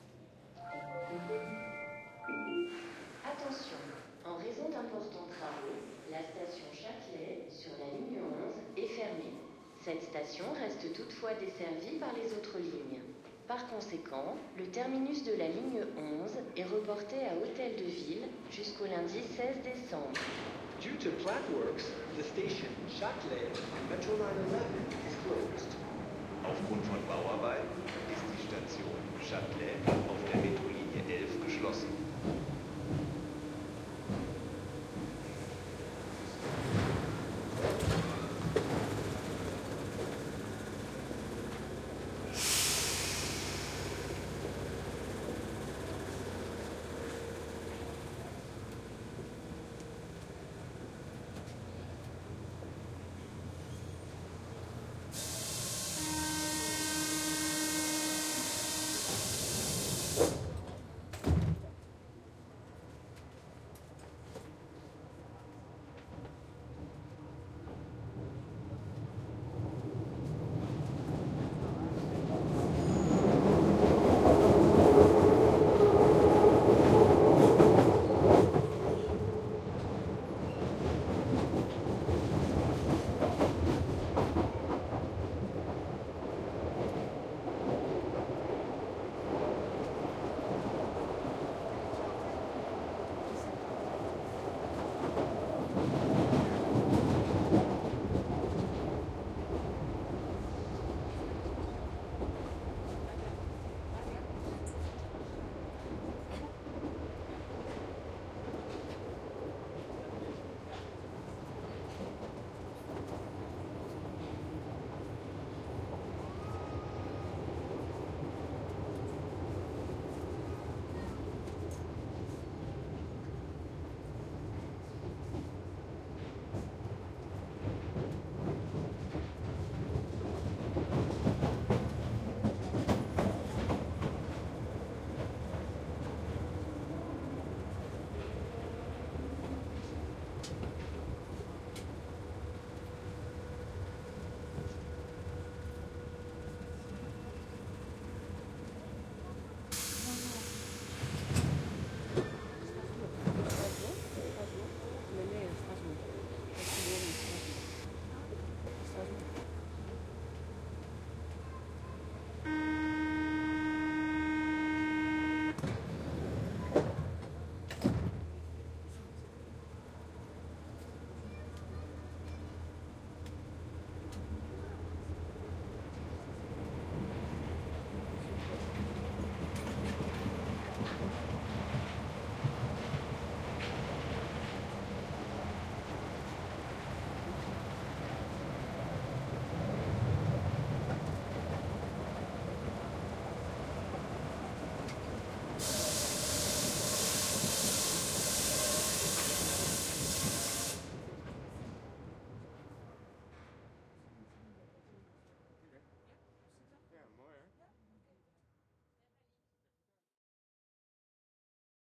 Atmo Paris Subway
A walk into a Paris metro-ride in Mid Side Stereo.
subway, platform, tube, arrival, announcement, railway, train, France, underground, metro, Paris, rail, departing, departure, doors-closing, station, field-recording